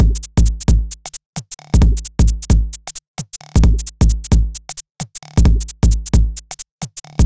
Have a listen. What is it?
132 BPM element for making yer own head-bopping tune.
electronic, funky, rhythmic, techno